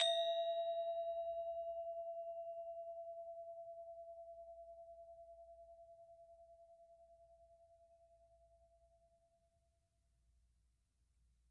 Bwana Kumala Gangsa Kantilan 02
University of North Texas Gamelan Bwana Kumala Kantilan recording 2. Recorded in 2006.